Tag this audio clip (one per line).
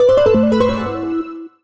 alert
cell